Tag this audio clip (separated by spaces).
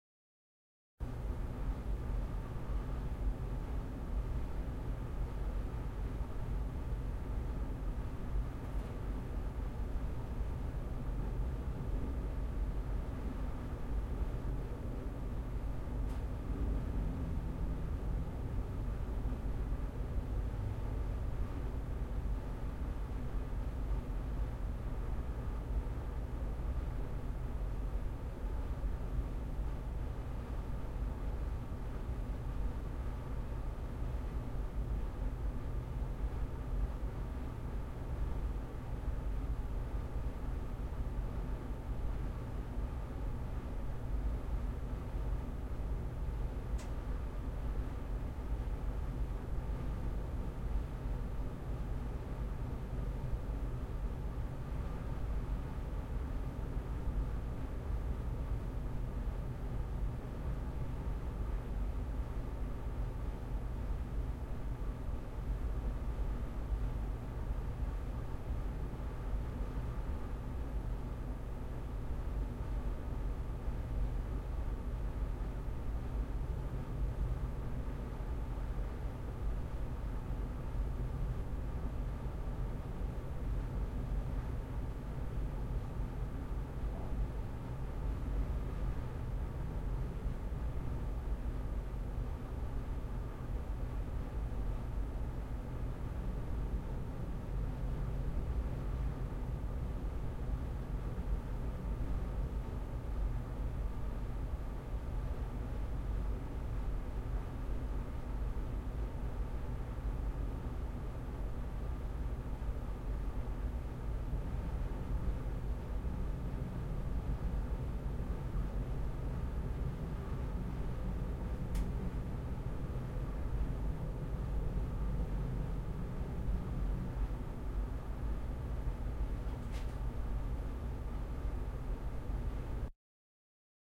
roomtones roomtoness